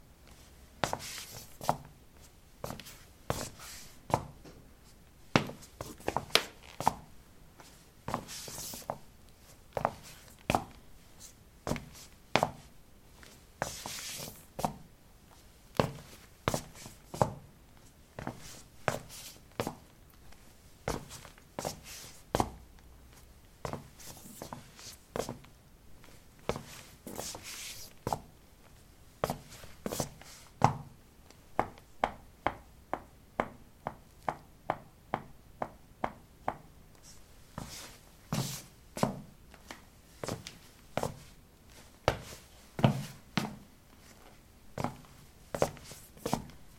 ceramic 12b squeakysportshoes shuffle tap threshold
Shuffling on ceramic tiles: squeaky sport shoes. Recorded with a ZOOM H2 in a bathroom of a house, normalized with Audacity.